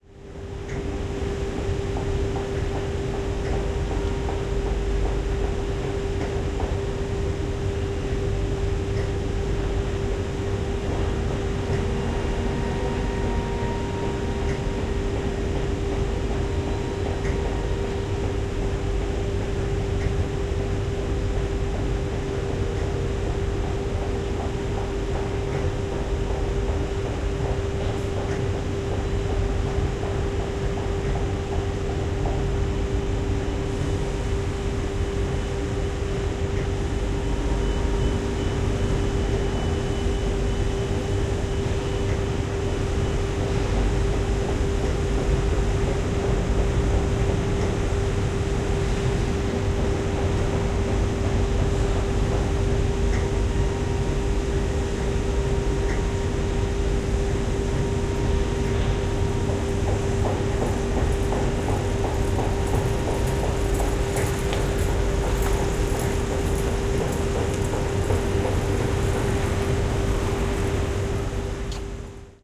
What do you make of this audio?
Fortunately I don't live nearby, but not far from home there is a factory that manufactures motorhomes, that's the atmosphere, made of machines, hammer noise blowers and all kinds of activity.
ambience, wind, factory, tunnel, machine, field-recording, hammer